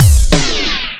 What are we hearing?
Brakes On 2
Simulated power cut on turntable
effect, scratch, turntable